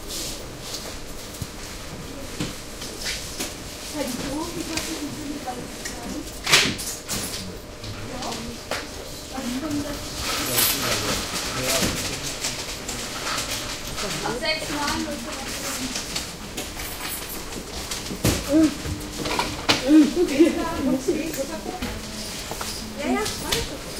Aldi Supermarket Ambience Berlin Germany
Ambience in a supermarket. Recorded with a Zoom H2.
mall, store, supermarkt